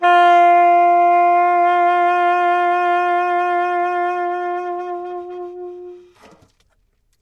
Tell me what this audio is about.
Tenor Sax f3 v95
The format is ready to use in sampletank but obviously can be imported to other samplers. The collection includes multiple articulations for a realistic performance.
saxophone, tenor-sax, sampled-instruments, woodwind, sax, jazz, vst